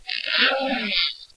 monster pain8
monster, pain, scream